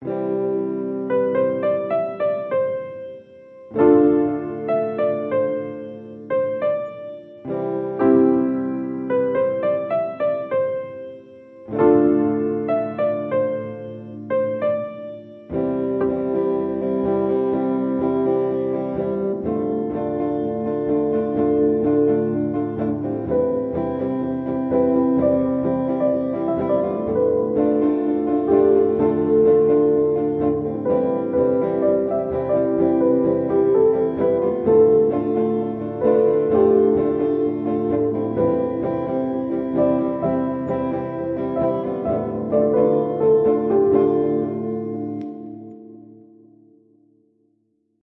Rainy days (Acoustic/Piano)
A release inspired by indie cafe sounds alongside soft calming piano with acoustic guitar.
8-bit, 8bit, Piano, acoustic, ambient, calm, calming, grand-piano, guitar, home, indie, keyboard, loop, low-fi, lowfi, mechanical-instrument, melancholic, moonlight, music, pretty, rain, repetition, rpg, sleep, soft, soothing, soundtrack